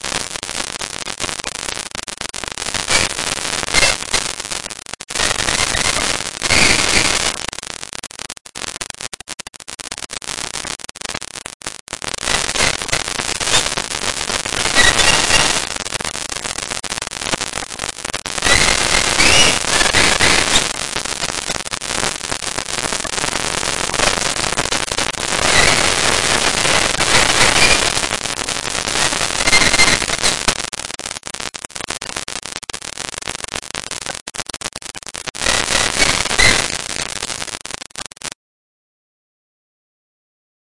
Byproduct of ableton. Used to be a birdcall.